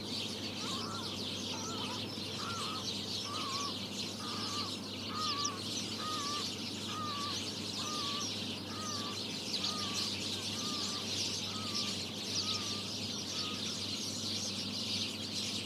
20061121.sparrows.marsh.03
marsh ambiance, with a large house sparrow group in foreground; cow moos, dog barks, and other distant noises.sennheiser me66+AKG CK94-shure fp24-iRiver H120, decoded to mid-side stereo